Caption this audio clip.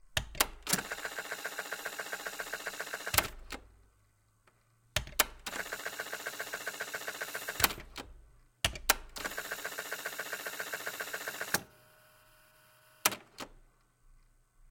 tape cassette machine Tascam 424mkiii rewind
Rewind sounds for the listed cassette recorder
machine, postproduction, sfx, AudioDramaHub, cassette